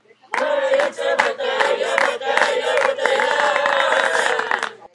hey it's your birthday and applause and cheers
An inspiration for this sound was those cheesy cards at Hallmark/American Greetings that were like (Happy birthday song) then "yay woohoo alright yay woo clap clap clap woo yay!" Hey!" The crowd is clapping in rhythm then applauding at the end. All voices were me and layered in Audacity. Recorded using a Mac's Built-in Microphone.